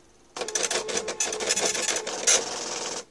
Epson receipt printer 1
This epson m188b printer is currently at Manchester international Airport at one of the restaurants in terminal 1.This epson m188b printer is currently at Manchester international Airport at one of the restaurants in terminal 1.
My friend Ethan was fixing this printer and e-mailed me a sound recording of this file.
This can be used for a modern receipt printer.
It can aalso be used as a game scoreboard counter.